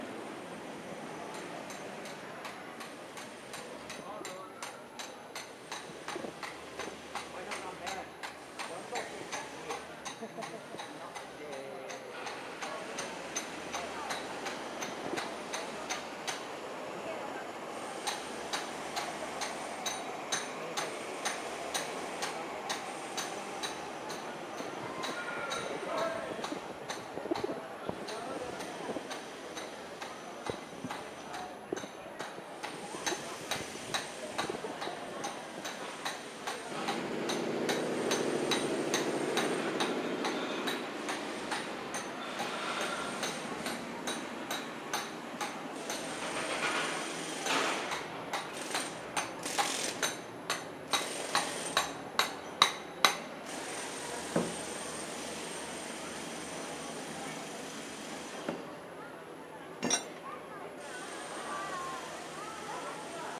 Ambiente - obra
workers sounds
MONO reccorded with Sennheiser 416